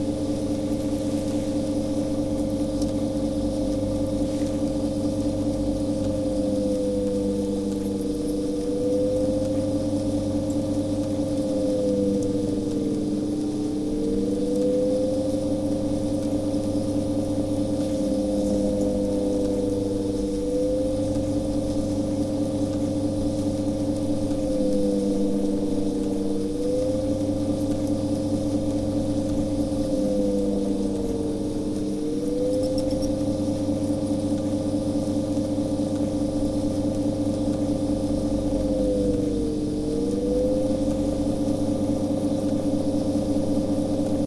Tape machine, running, faster and slower, tape loaded. stereo
Tape machine running with tape loaded and the motor is forced to work harder and run slower by pressing on the idle wheels making it run faster and slower and its motor pitch distort as it does so.
Recorded using AKG 414 mics